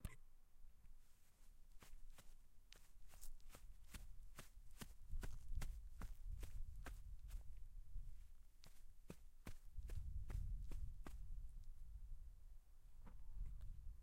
quick steps 2
I had recorded these steps for my action movie. These are steps on my garden lawn.I hope that it will help you in your movie projects.
fast, steps